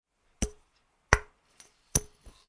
Bottle Hits
3 different hits on a small bottle.
Film, Glass, Animation, Clink, Prop, Bottles, Foley, Hit, Single, Cinematic